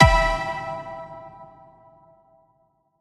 Tonal Impact E
Tonal impact playing the note E.
ableton, alternative, clandestine, drone, electro, electronic, E-minor, hip-hop, impact, layered, processed, tonal